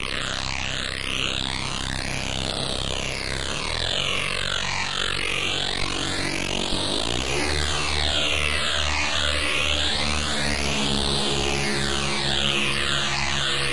Reese synth high-passed and a phaser.
high-pass, synth